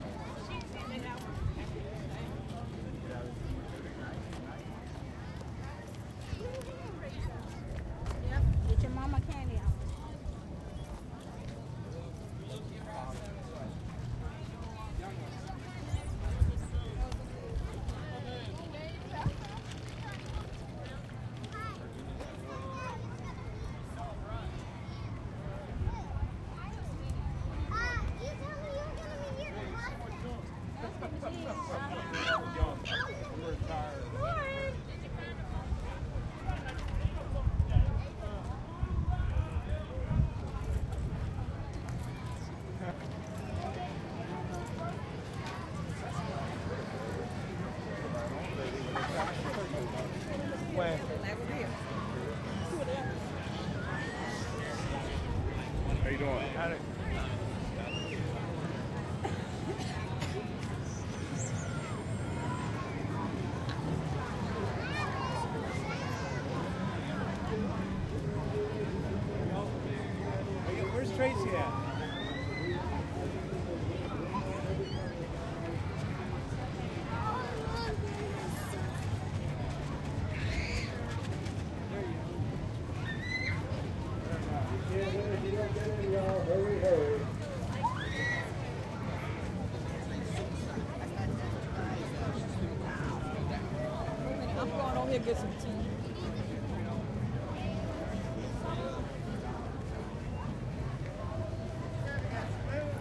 VA State Fair # 4 (Walk Through Crowd)
Random bits of conversation, screaming children, etc.
crowd, fair, state, virginia